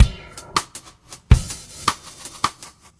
swirly rims 80
swirly sounding rimmy loop. Drum loop created by me, Number at end indicates tempo